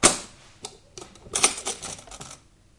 sugar open
Opening the top of a latched container
kitchen, latch, open, sugar